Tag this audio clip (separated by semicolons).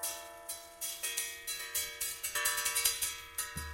brush; hits; objects; random; scrapes; taps; thumps; variable